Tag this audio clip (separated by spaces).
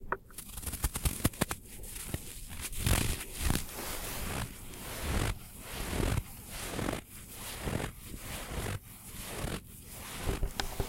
foot footstep frost ice running snow step winter